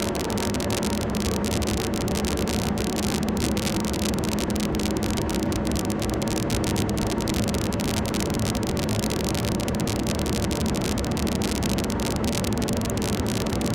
SwarajiwaTH Noise
Called "noise" but really more like wind blowing through a pipe with some clicks like on an old record
synth1, noise, wind